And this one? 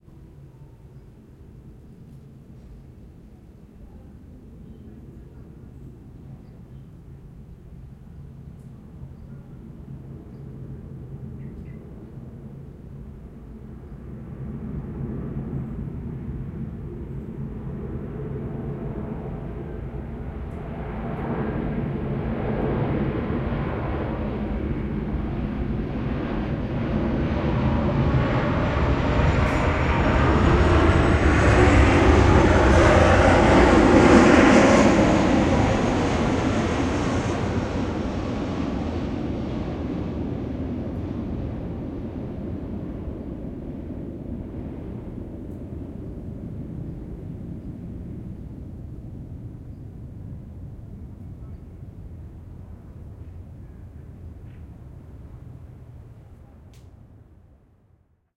Plane flying over Yogyakarta
A plane flaying over my head, going to land, in Yogyakarta, Indonesia, 2014.
aeroplane, aircraft, airplane, aviation, flight, flying, indonesia, plane, yogyakarta